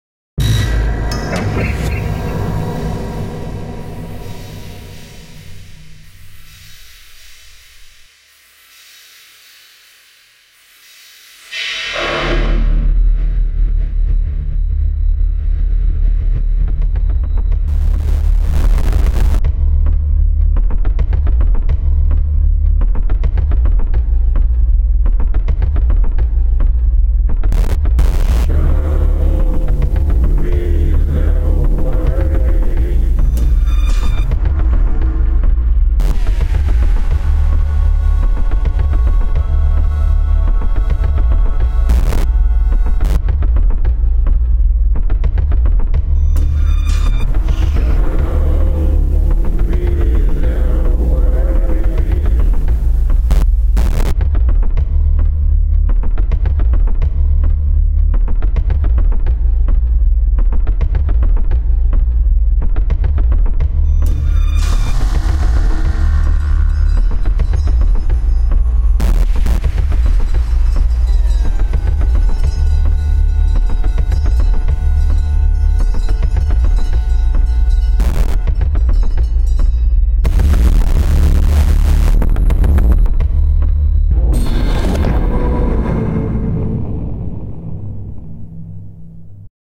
olabunny2Xcenourabigode
space, future, sounds, wave, star, SUN, radio